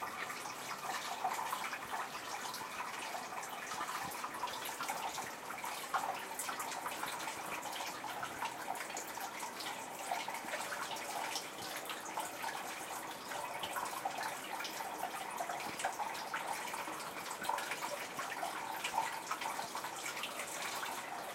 10 water spring closer
field recording little processed in post, location is canyon of river Rjecina (mill Zakalj) near town Rijeka in Croatia
close, canyon, rjecina, water, spring, field